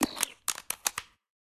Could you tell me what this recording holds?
Ammo Pick-Up
Sound of Gun "ammo" being picked up and loaded into a gun. geared more towards a sic-fi or laser type gun.